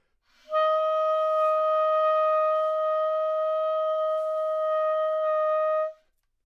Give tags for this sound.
multisample single-note

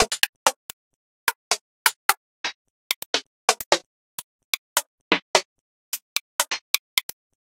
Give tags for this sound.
snare drums

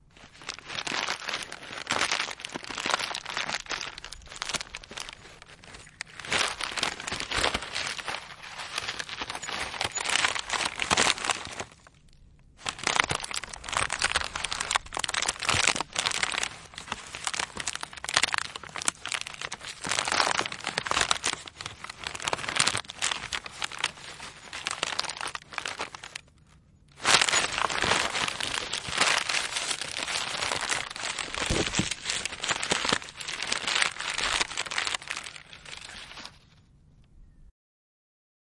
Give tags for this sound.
scrunch paper crunch bag rustle crumble OWI

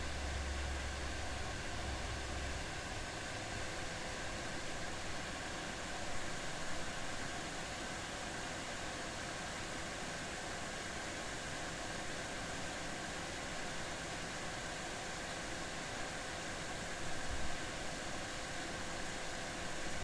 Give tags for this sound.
Air-conditioner Ambient Computer CPU Fan

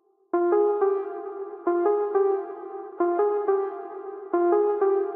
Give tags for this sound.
arp melody synth

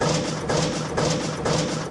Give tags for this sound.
factory machine office plant sfx

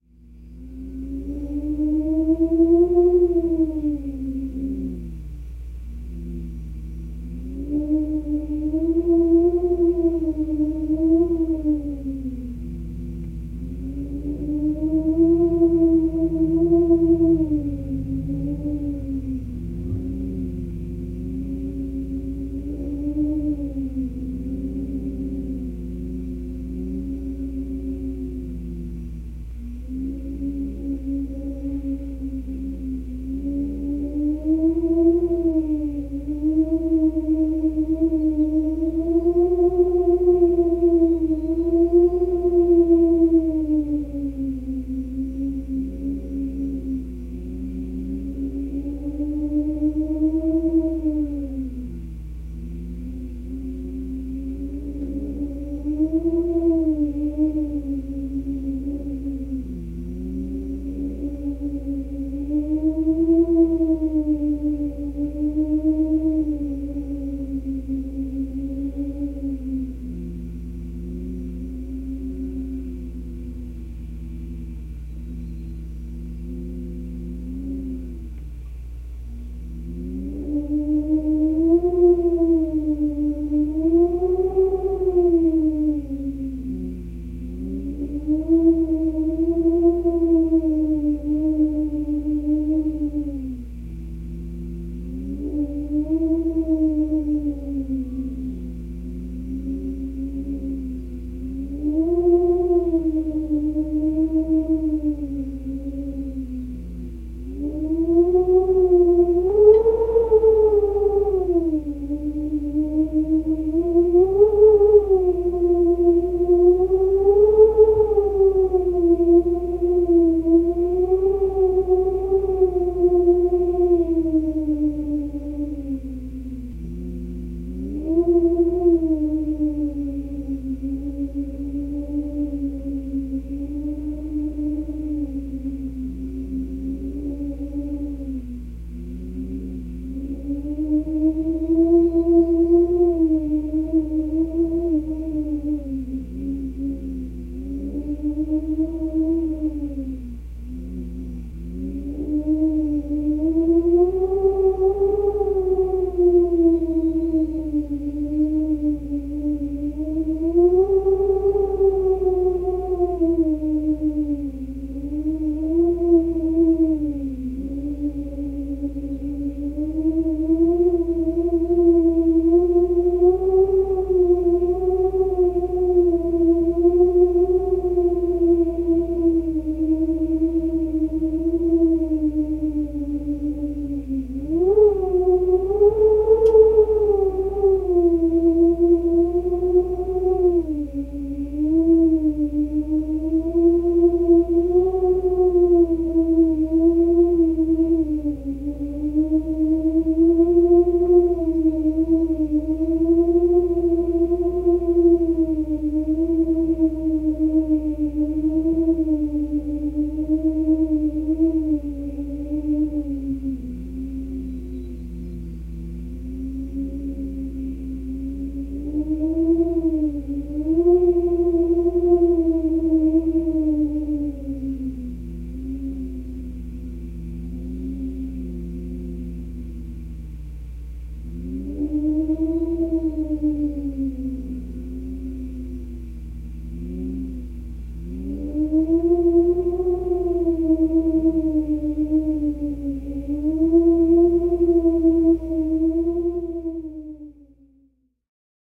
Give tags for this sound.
Autio Draught Field-Recording Finland Finnish-Broadcasting-Company Luonto Moan Nature Soundfx Suomi Talo Tehosteet Tuuli Veto Weather Whistle Wind Yle Yleisradio

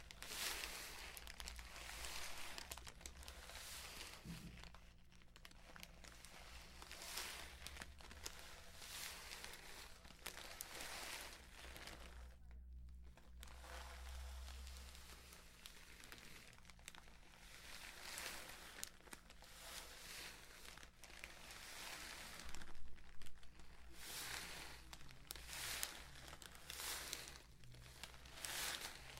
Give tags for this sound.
water burst

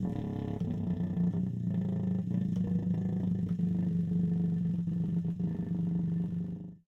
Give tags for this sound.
davood extended low subtone technique trumpet

